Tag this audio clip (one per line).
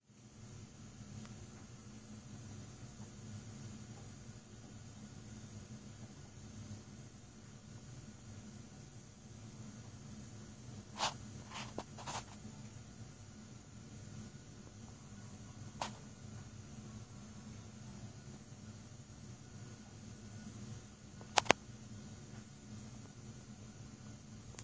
ambient
buzz
hum